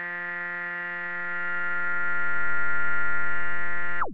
Multisamples created with subsynth using square and triangle waveform.
synth, subtractive, multisample, square, triangle